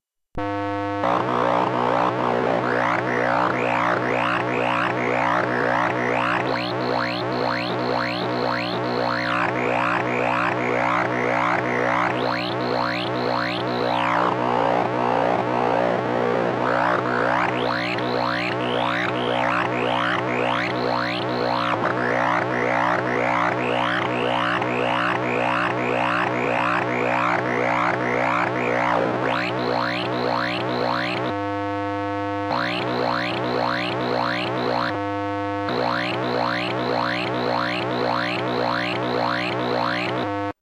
Colorino light probe, LED nightlight, on, off, move around
Moving the Colorino around near an LED nightlight I borrowed from the bathroom. LED's have some seriously strange modulation. This one's brighter than the average LED that shows your electronic device is on, but not as bright as the 4-watt incandescent nightlight we used to have. It doesn't seem to have a discernable attack and fade when turned on and off, but who can really tell with that crazy woop woop modulation. How can anybody look at that!
The Colorino Talking Color Identifier and Light Probe produces a tone when you hold down the light probe button. It's a pocket sized 2-in-1 unit, which is a Color Identifier/Light Detector for the blind and colorblind. The stronger the light source, the higher the pitch. The more light it receives, the higher the pitch. So you can vary the pitch by moving and turning it.
Recorded from line-in on my desktop using Goldwave. Low-pass filter was applied to lock out the 16khz sampling frequency.
60hz-buz, accessibility, Assistive-technology, blind, buzz, buzzy, color-blind, color-detector, electronic, experimental, fm, frequency-modulation, led, led-light, light-probe, light-to-sound, modulated-light, modulation, noise, playing, scifi, tone, weep-weep, weird, woop-woop